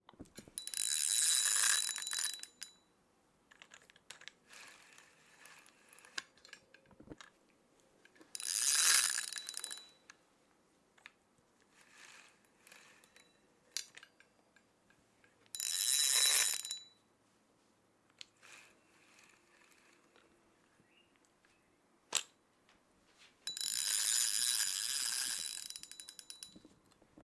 A fishing reel being reeled in and the break giving resistance when the line is tugged on.
sfx, reel, fishing-reel, fishing, fishing-rod, OWI, sound-effect